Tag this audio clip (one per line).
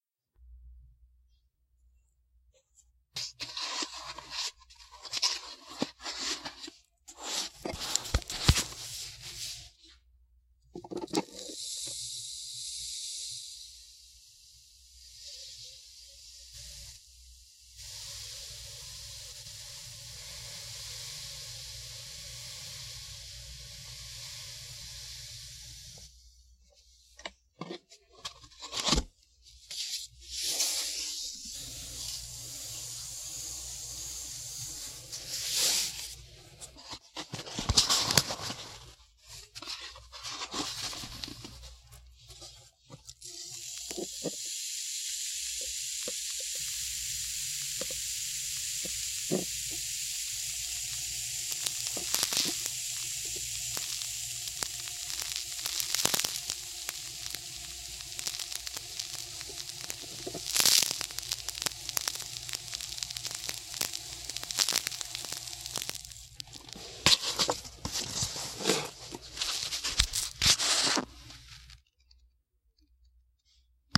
dune
pour
walk
movement
scrabble
move
sand
motion